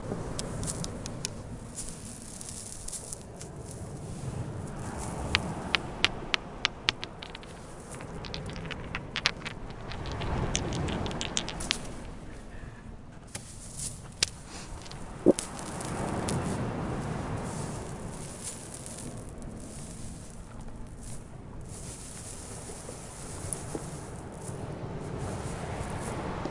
Coloane HacsaBeach SandNoise Stones
Hacsa beach Coloane Macau
beach, sand, sea, stone